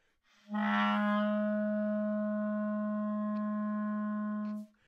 Part of the Good-sounds dataset of monophonic instrumental sounds.
instrument::clarinet
note::Gsharp
octave::3
midi note::44
good-sounds-id::1734
Intentionally played as an example of bad-attack-multiphonic